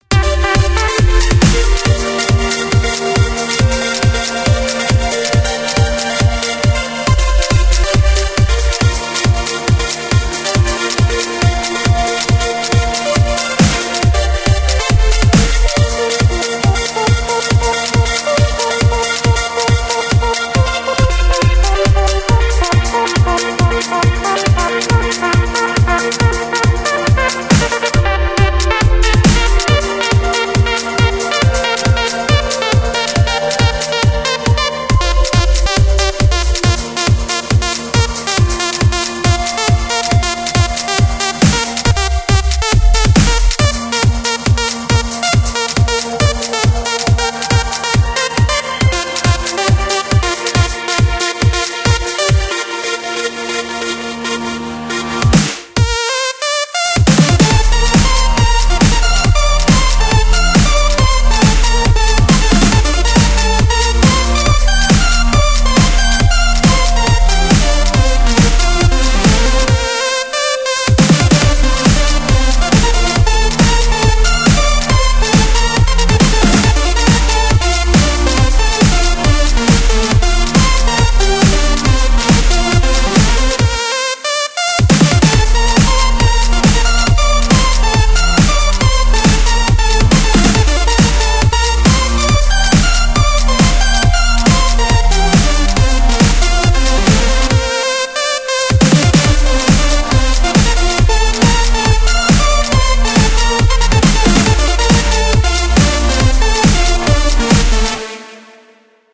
Busybody Loop
Another clip from one of my uncompleted tracks. Like all my loops, it is not even 50% finished and is for anybody to do as they wish.
compression, flange, hats, house, limiters, reverb